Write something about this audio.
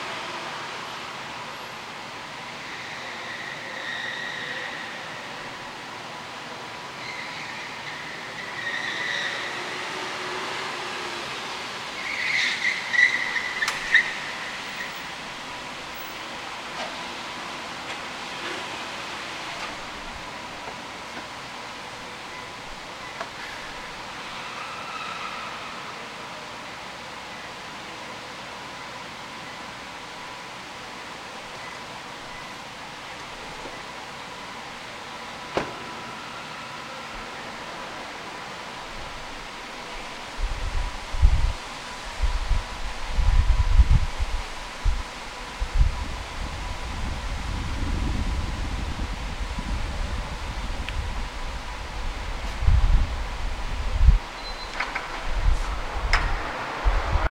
Car Parking Underground
A car parking inside a building lot, with echo from tires screeching as it parks, recorded with Azden Mic.